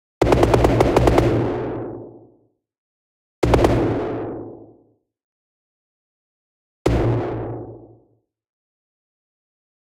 Rapid Fire, semi-auto fire and single shot. Lots of distortion :)
This is with a synth.
Gunshots, DistortedSound, SynthGunshot